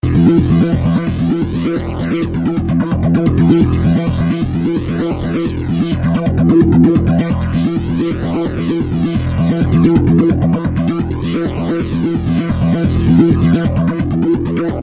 The bass for one of my other files. Raw, with no reverb.
arp, trance, bass